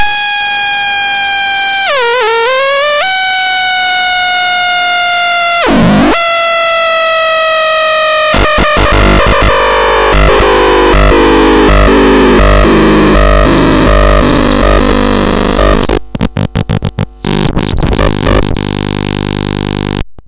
KEL-DeathOfASiren
You know these Electronic Labs for kids & youngsters where one builts electronic circuits in a painting by numbers way by connecting patch-wires to springs on tastelessly colourful boards of components?
I tried and recorded some of the Audio-related Experiments - simple oscillators, siren, etc. from a Maxitronic 30 in One Kit.
I did not denoise them or cut/gate out the background hum which is quite noticable in parts (breaks) because I felt that it was part of the character of the sound. Apply your own noise reduction/noise gate if necessary.